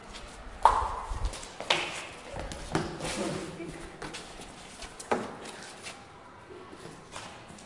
SonicSnap JPPT5 Glass
Sounds recorded at Colégio João Paulo II school, Braga, Portugal.
glass, Portugal, Joao-Paulo-II, fruit-throwing